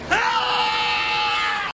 Elvis Battle Cry 1
Scott Peat as Elvis Strawbridge - battle cry.
voice, human, scream, male, battle-cry, dead-season